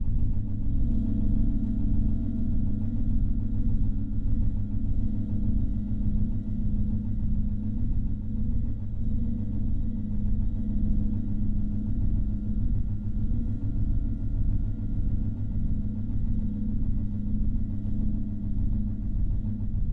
Industrial Noises Ambient Sound 1
An industrial ambient noises sfx sound created for your game, movie or whatever other project: it sounds like industrial machines, factory ambient, engines, a space station, coolers, fans, etc.
Looping seamless.
ambient, atmosphere, background, cooler, dark, deposit, drone, effect, electrical, engine, factory, fan, future, futuristic, fx, industrial, loop, machine, noises, science-fiction, sci-fi, scifi, sfx, simulation, sound, sound-design, sounddesign, space, strange